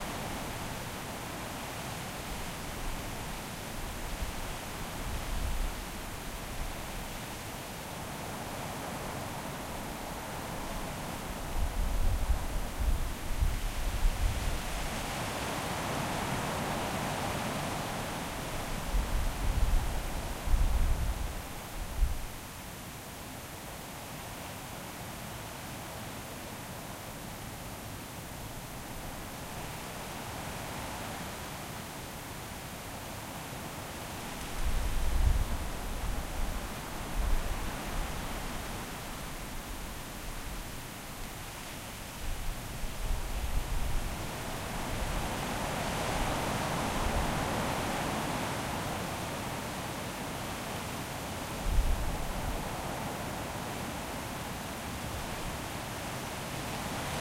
leaves-penyfridd
Ruined cottage, North Wales. A sycamore tree covers almost all of what used to be the yard, and the branches hang down to about head-height. Late spring, and the leaves are new. When the wind blows through them the whispering sound seems to surround you.